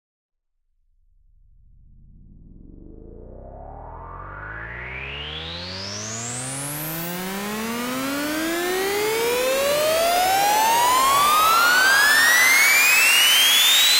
Riser Pitched 03
Riser made with Massive in Reaper. Eight bars long.